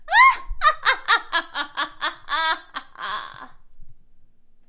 A witch laughs evilly.
(If you have a request, send me a message friend)
witch, crazy, voice, giggle, female, cackle, evil, girl, woman